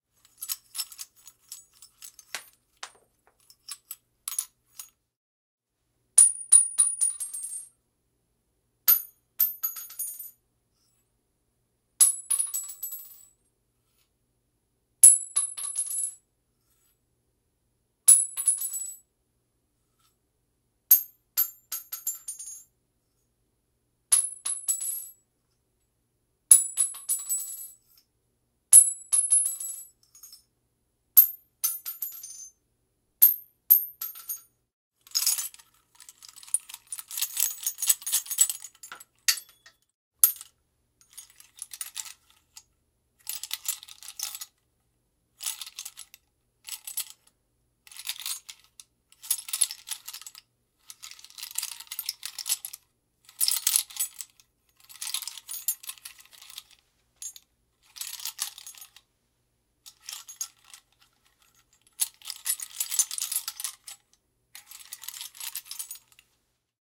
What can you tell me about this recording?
munition shells
Dropping shotgun shell casings onto cement.